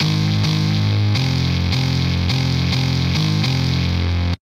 105 Necropolis Synth 05
heavy gut synth
halloween, dark, loop, free, necropolis, synths, grunge